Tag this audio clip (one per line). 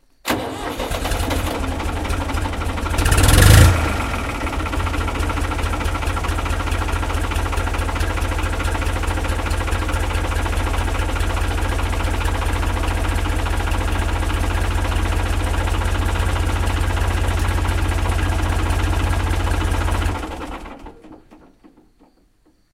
start
engine
shutdown